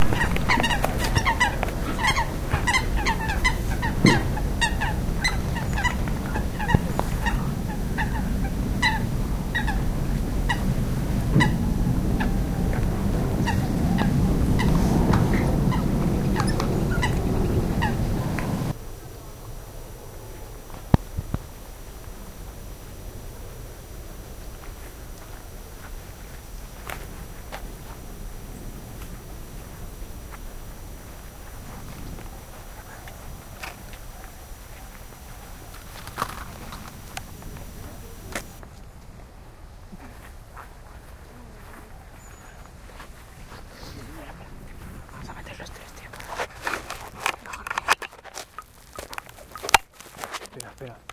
Fotja i altres
Coots in the Delta of Llobregat. Recorded with a Zoom H1 recorder.
Coots, fotja, altres, Deltasona, Llobregat